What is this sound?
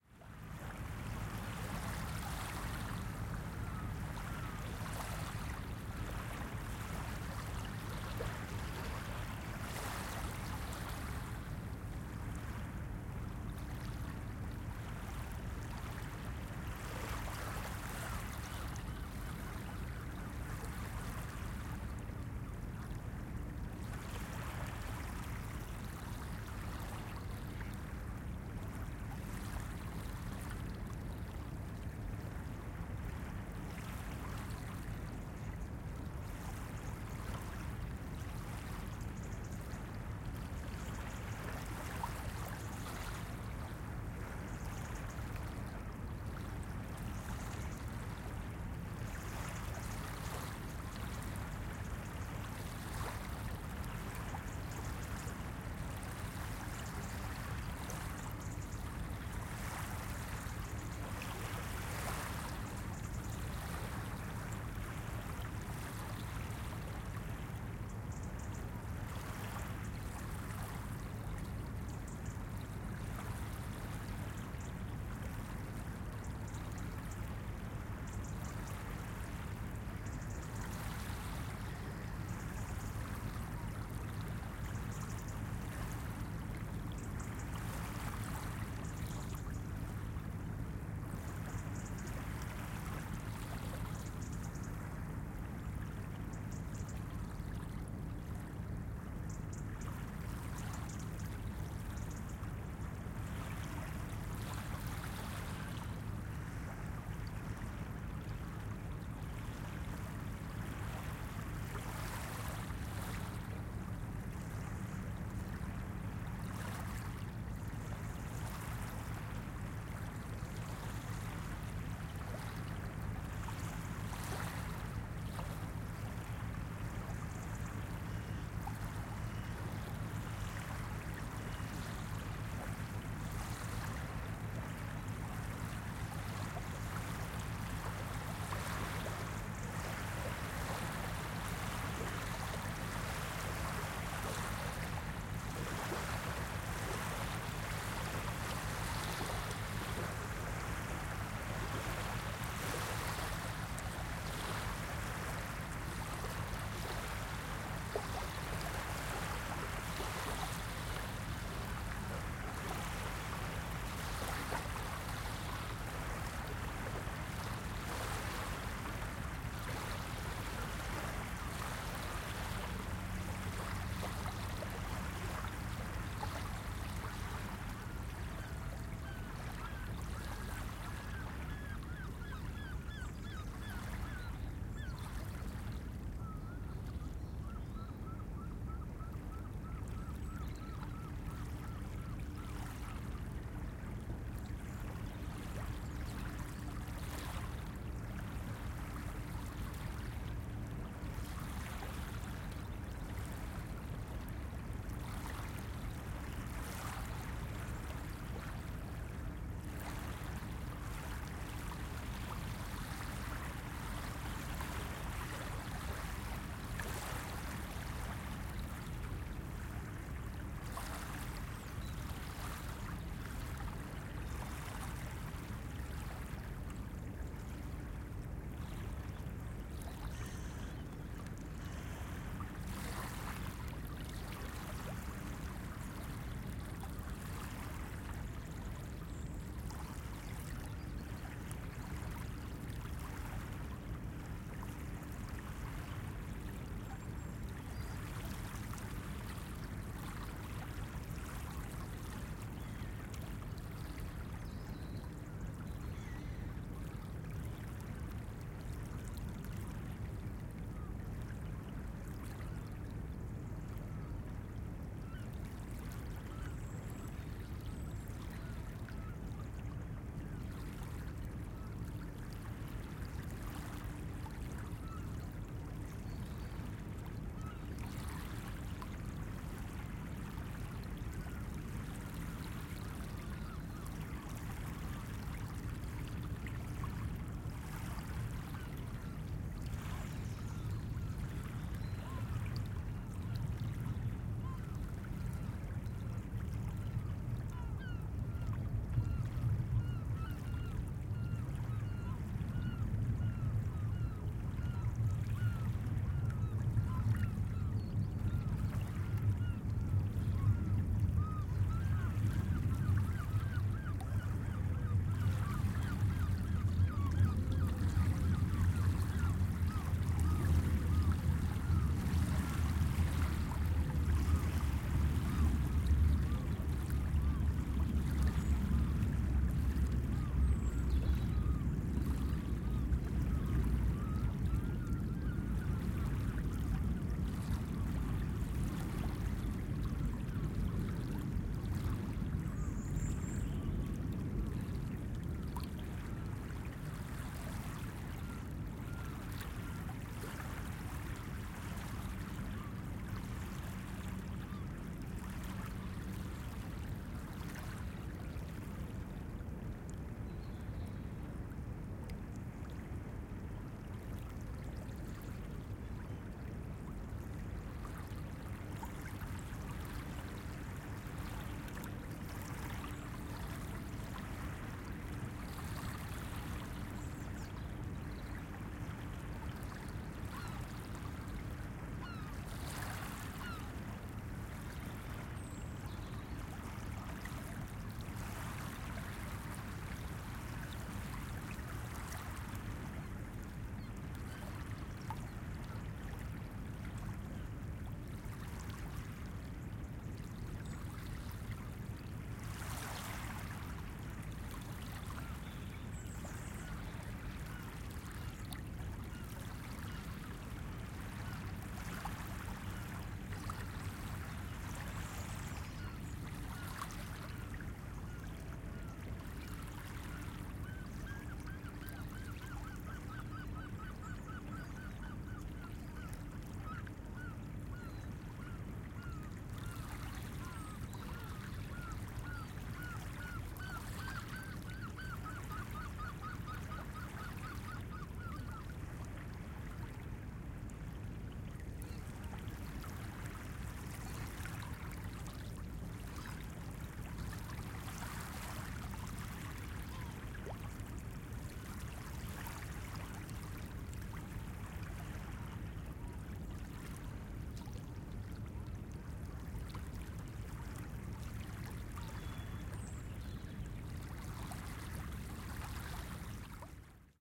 Weston Shore Calm Early Morning
The sound of Southampton Water at around 5am on a Bank Holiday Monday (National holiday). The microphone was placed right at the shoreline where the water meets the land. There are distant boats/ships, the oil refinery and a few sea birds. The wind was about 2 to 4 mph and that created some very small waves that can be heard lapping against the shore.
MixPre3, Rode SVMX, Rode deadcat, 17Hz HP